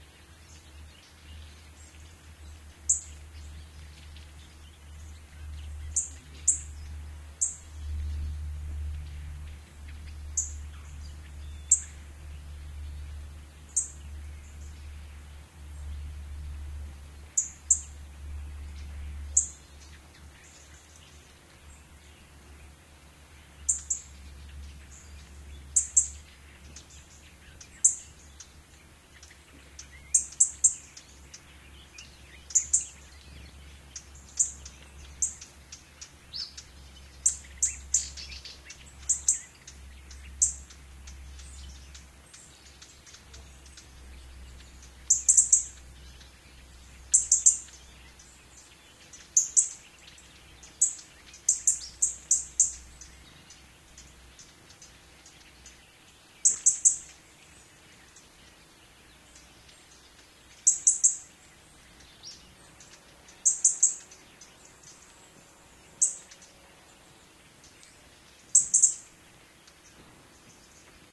Very close Robin chirping. In background: a passing train, an airplain, other birds, distant bangs... Recorded near Embalse del Retortillo (Sevilla province, S Spain) using a mid-side setup (Sennheiser MKH60 + MKH30 inside Rycote), Shure FP24 preamp, PCM M10 recorder. Decoded to MS stereo with free Voxengo VST plugin
ambiance, birds, field-recording, mediterranen-forest, mid-side